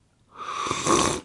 slurping a coffee number 1